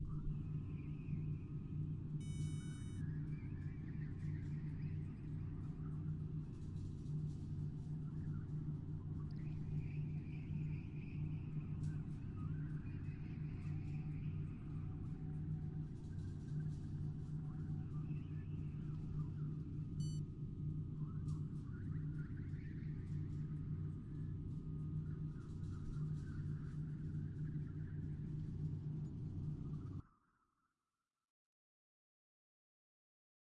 The cockpit of an abandoned spaceship
Cockpit, Ominous, Spaceship